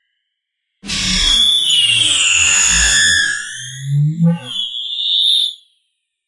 PAINFULLY SCREECHING FLY-BY. Outer world sound effect produced using the excellent 'KtGranulator' vst effect by Koen of smartelectronix.